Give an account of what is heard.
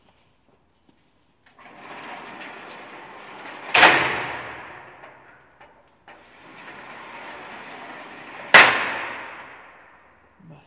Sounds recorded from a prision.